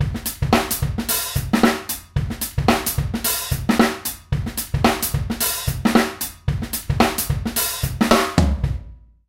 A drum beat with syncopated hihat pattern. Compressed.